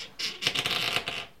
Door - Creak 02
A door creak
creak, Creaking, Door, Groan, Open, Squeak, Wooden